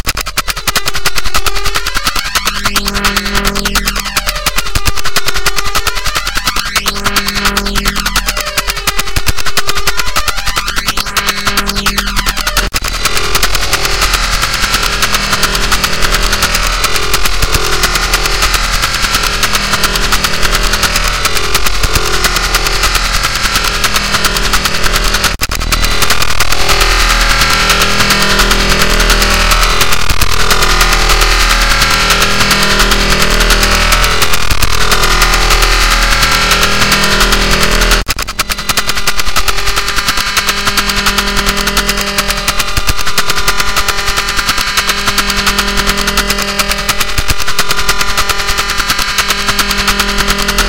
US artillery radar working full power in four modes.
radar, artillery, american